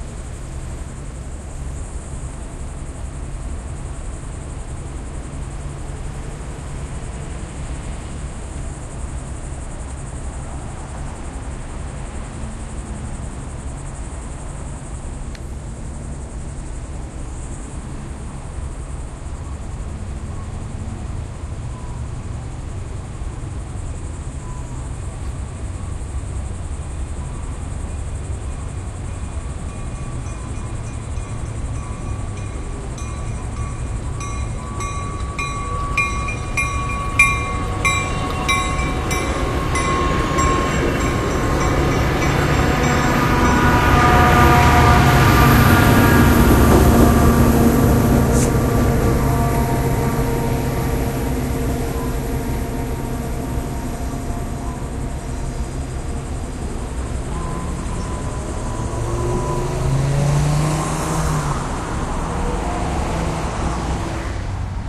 Sounds of the city and suburbs recorded with Olympus DS-40 with Sony ECMDS70P. Tri-rail train passing through intersection in the morning.